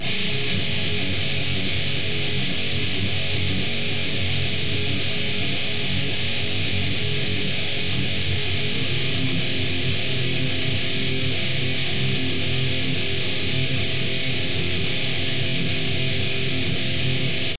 melody riff 1
i think most of thease are 120 bpm not to sure
1, groove, guitar, hardcore, heavy, loops, metal, rock, rythem, rythum, thrash